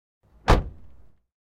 closing car Door
the sound of closing a car door